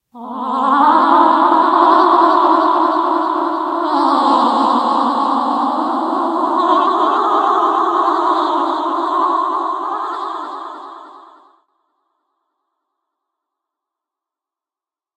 Singing Ghosts I
Ghosts sing. I record.
sing, ghost, creepy, Halloween, eerie